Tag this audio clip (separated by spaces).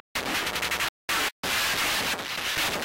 techno freaky glitchbreak glitch breakcore